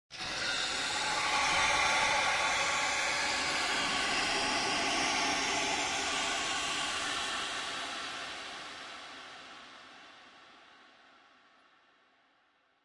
Result of a Tone2 Firebird session with several Reverbs.
experimental, reverb, atmosphere, dark, ambient